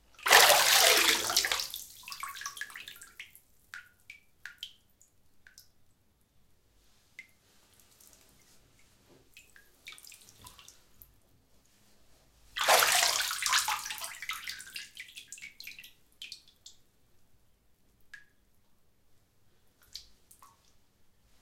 Bath - Body emerging
Someone emerging from a bathtub - interior recording - Mono.
Recorded in 2003
Tascam DAT DA-P1 recorder + Senheiser MKH40 Microphone.
water, foley, bath, emerging, bathroom